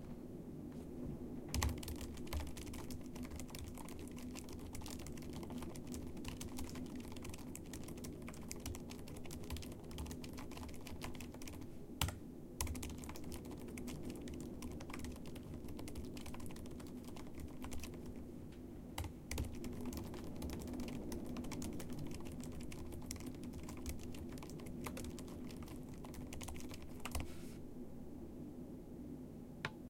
Keyboard Typing
A recording of me typing on my laptop, recorded with a Zoom H4N.
computer, keyboard, typing